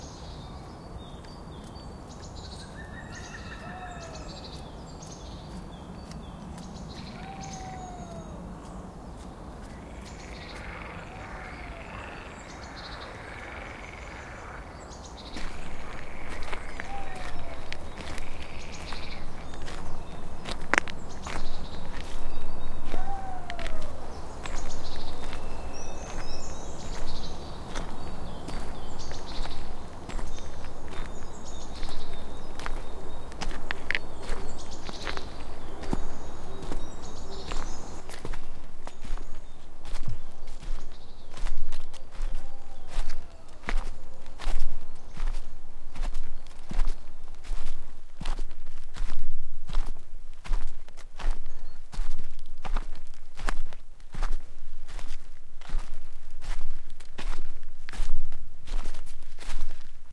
I recorded while walking through the forest in the morning.
field-recording, ambience, ambient